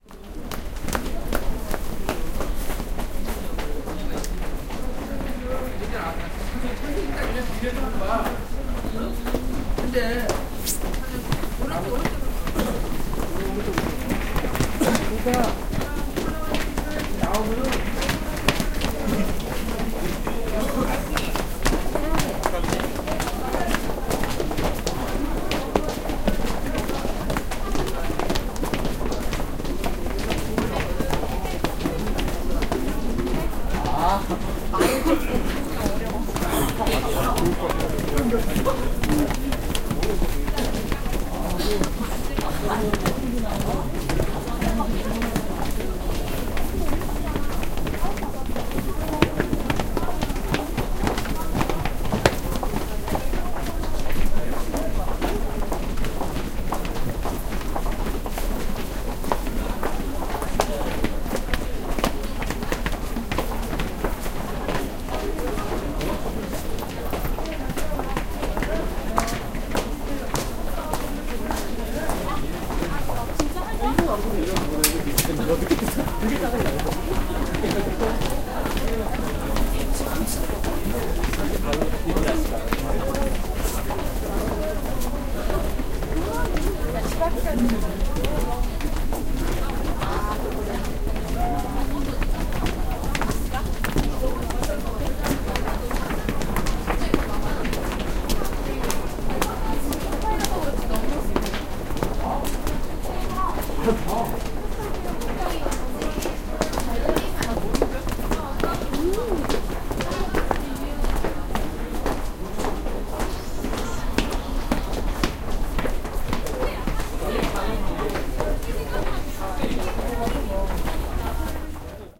0018 Footsteps stairs
People walking stairs and talk. Metro station.
20120112
field-recording, korean, seoul, stairs, voice